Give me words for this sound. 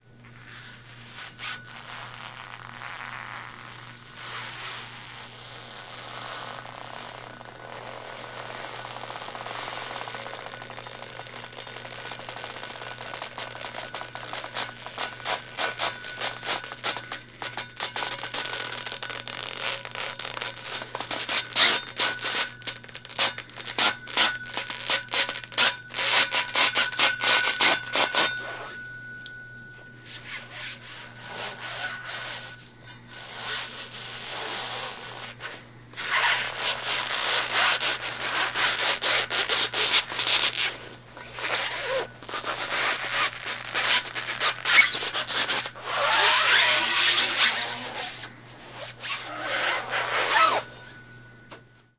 squeak pole
Some really 'awful' squeaky sounds made by rubbing foam around a metal pole.